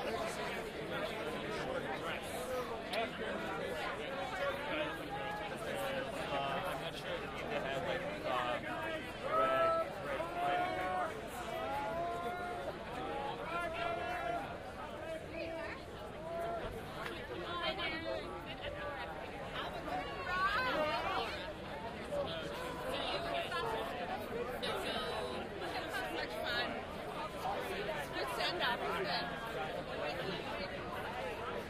Stereo binaural field recording of a large crowd talking amongst themselves.
binaural, recording, walla, crowd, talking, field, stereo, group